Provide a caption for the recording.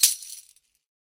Samba Chocalho de Platinela - Forward 6
The Chocalho de Platinela ('rattle/shaker with little plates') is a large and very loud metal shaker, with jingles similar to a tambourine, mounted in three long rows on an aluminium frame. They are used en masse in samba bands, usually playing a simple but very fast rhythm.
A forward (away from the body) shake.
chocalho, percussion, shaker, jingle, jingles, brazilian, platinelas, bateria, samba, brazil